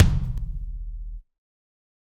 Kick Of God Wet 027
set, pack, kit, realistic, kick, drum, drumset, god